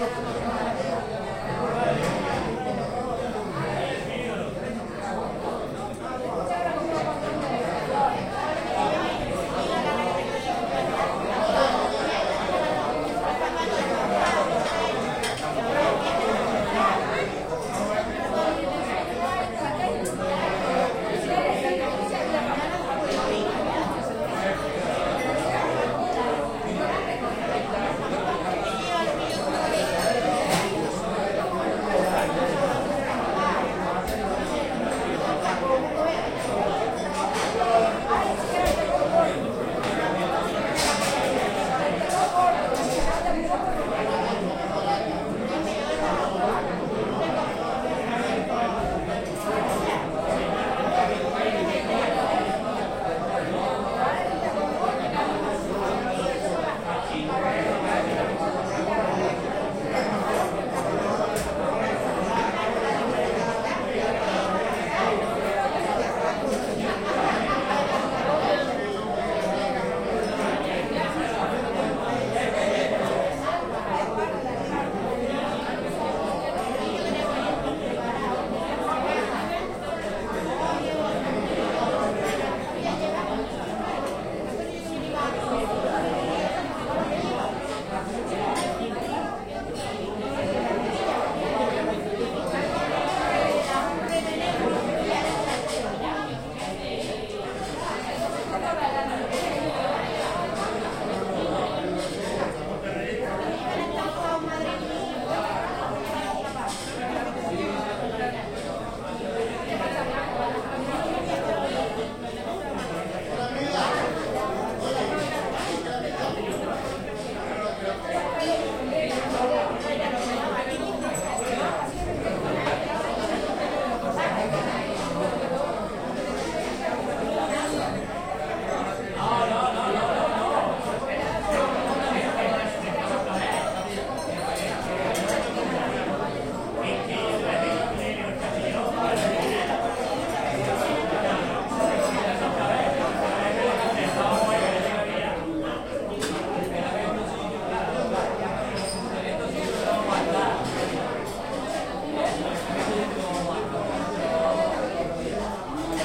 I recorded this sound in a tapas bar in Andalusia (Orgiva in Sierra Nevada) early afternoon. There were approx. one hundred people having a lunch break. Recorded using Marantz Professional Solid State recorder PM661 and Rode NTG-3 Microphone.
Espana, talking, crowd, Spain, Andalusia